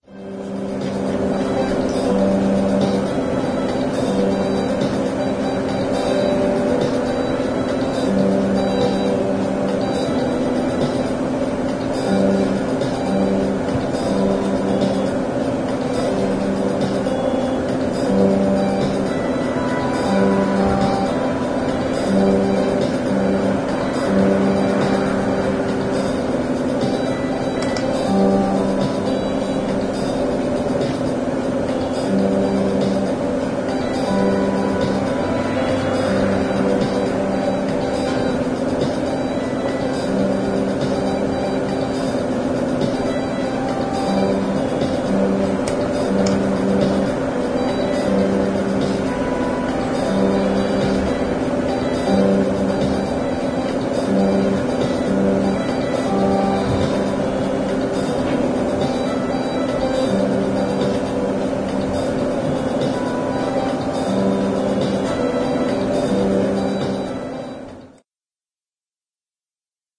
hydrolic flower-120-Carab-1
It's like the mix of music that you hear when walking or driving through a big busy city. Made with the strange Critters program. Phasing effect added with Audacity.
city, fx, music, radio, urban